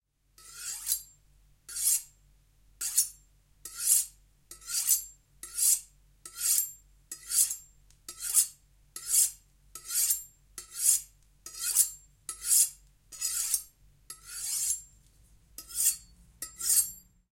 SlowKnife Sharpening
chef, EM172, LM49990, Primo, vegetables